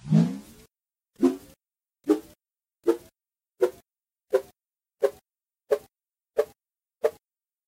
Now in multiple takes. (but the first take sounds a little strange and faked)
air, bamboo, branch, cane, fake, faked, flap, foley, move, slash, slice, stance, stick, swash, sweep, swing, swoosh, swosh, synth, synthetic, tree, wave, whip, whoosh, wind, wood, woosh